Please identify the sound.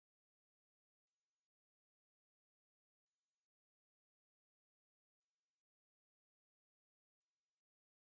This is the sound of a Maha MH-C9000 (Powerex WizardOne ) battery charger amplified. It is the sound of the internal transformer pulsing to charge some AA NiMH batteries.